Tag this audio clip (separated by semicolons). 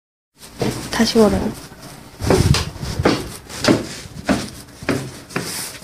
step; walk; footstep